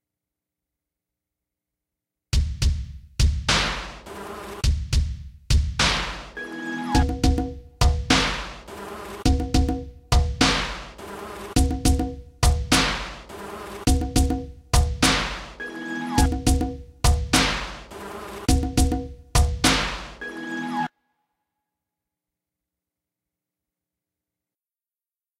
Breaks Beat 4811
A beat using a Breaks Kit/Sample at 117 BPM.
117, bass, beats, bpm, breaks, bumpin, cool, dub, egg, electronic, indian, kick, music, production, shaker, snare, snickerdoodle